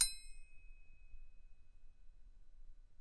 Trillend metaal - mes op glas
Simply a sound of a knife hitting a glass.
metallic, ting, metal, clear, clang, blacksmith, high, glass, iron